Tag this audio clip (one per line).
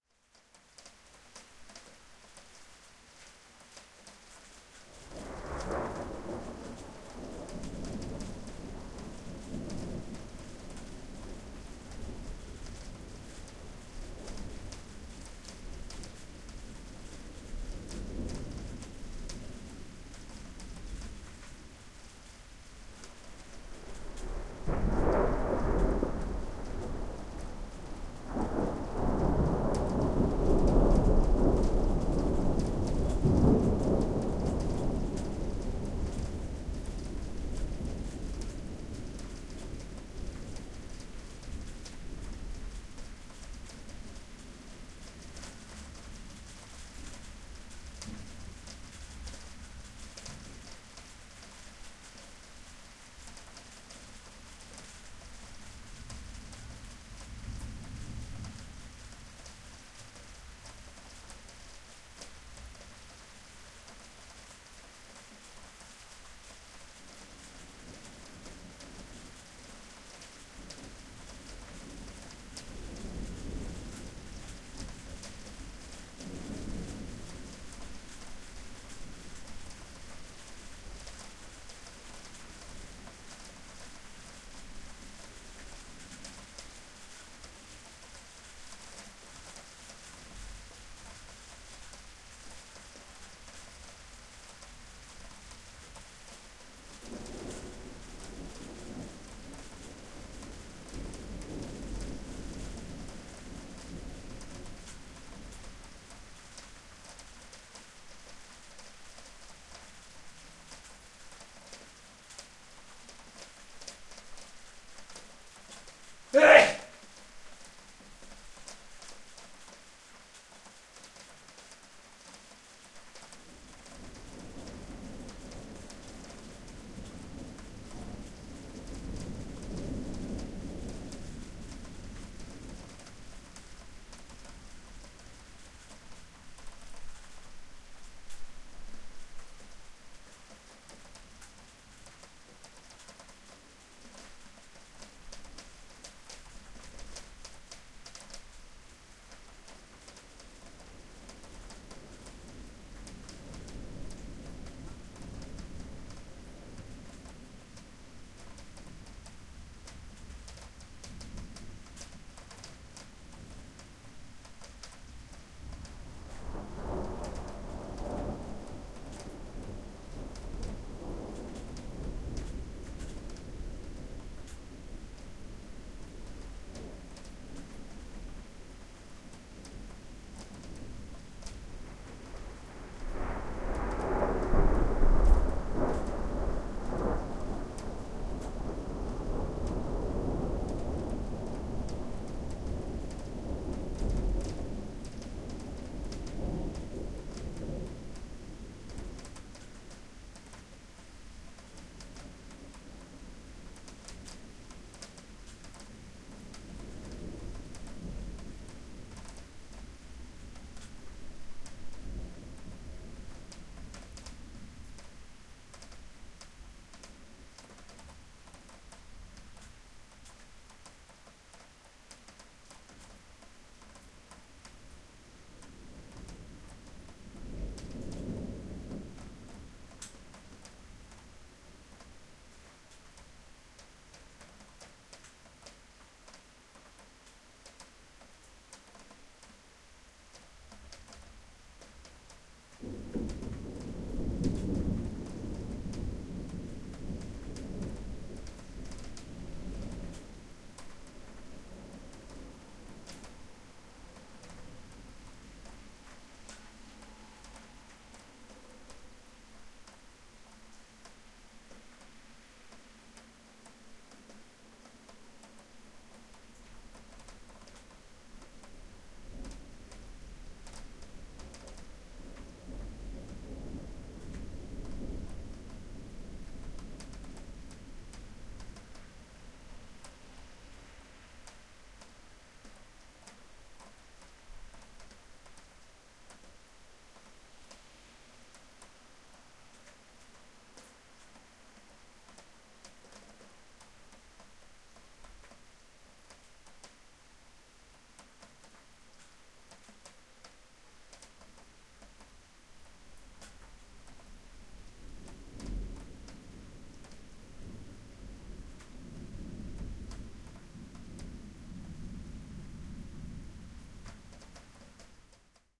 weather
thunder
atmosphere